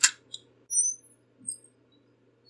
hd-com-defeito
Broken computer Hard Disk Drive, by "My BGM Radio RuizBRX11".